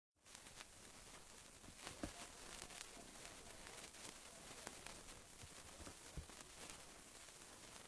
Audio of sparkler being burnt